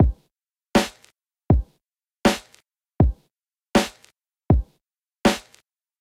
Very simple kick drum and snare 80bpm rock beat with a degraded vinyl sound.
Made with Loopmaster samples mixed in Ableton.